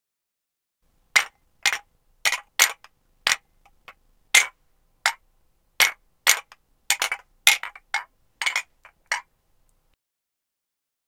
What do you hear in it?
Ceramic mugs being clinked together. Useful for those moments of "cheers!" Also probably useful for general mug handling noises if someone is carrying multiple empty mugs with one hand.
cheers, clink, mug, mugs